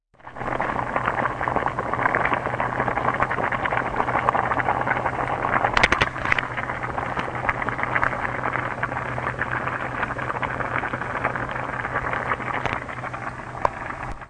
boiling bubbles ingredients
A small recording of a pot with spaghetti noodles boiling inside. Same as Version 2 except small pops and breaking noises are included. Perfect for your bubbly brew!
boiling, brewing, bubbles, simmer, water